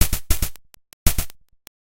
heavy drum loop created from a noise source